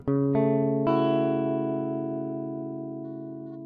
guitar arrpegio 5
This is some usefull guitar arpeggio what I was recorded on free time..